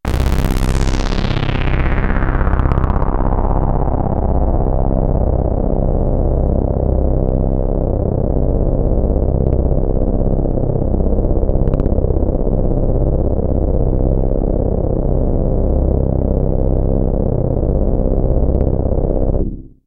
Mopho Dave Smith Instruments Basic Wave Sample - MOPHO C1